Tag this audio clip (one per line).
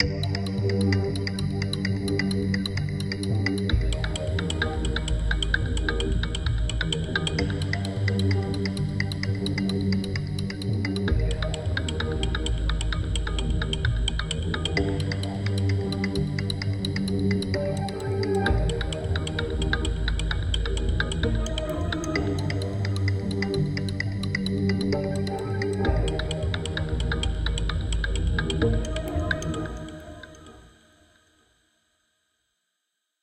atmosphere
background
cinematic
dark
dramatic
drone
hollywood
horror
mood
music
pad
soundscape
suspense
thriller